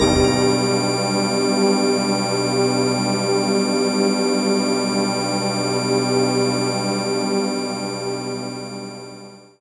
PPG Digital Organ Leadpad G#2
This sample is part of the "PPG
MULTISAMPLE 002 Digital Organ Leadpad" sample pack. It is an
experimental sound consiting of several layers, suitable for
experimental music. The first layer is at the start of the sound and is
a short harsh sound burst. This layer is followed by two other slowly
decaying panned layers, one low & the other higher in frequency. In
the sample pack there are 16 samples evenly spread across 5 octaves (C1
till C6). The note in the sample name (C, E or G#) does not indicate
the pitch of the sound but the key on my keyboard. The sound was
created on the PPG VSTi. After that normalising and fades where applied within Cubase SX.
ppg, digital, experimental